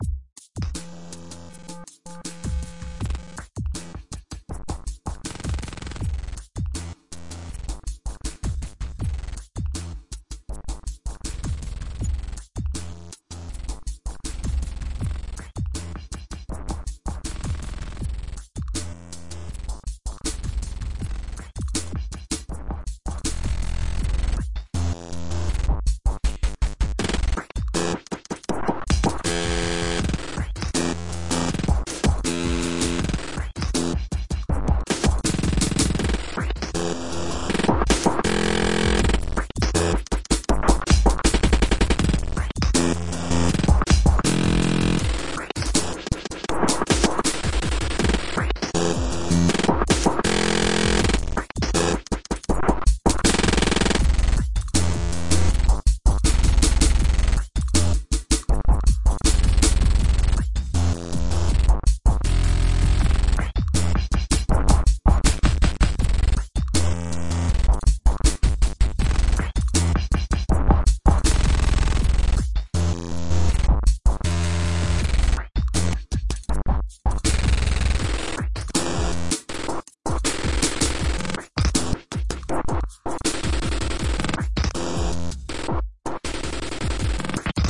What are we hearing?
Cloudlab-200t-V1.2 for Reaktor-6 is a software emulation of the Buchla-200-and-200e-modular-system.
These files are just random sounds generated by the software. The samples are in no standard key and a BPM number cannot be assigned but they may be useful when creating experimental, soundtrack or other types of music.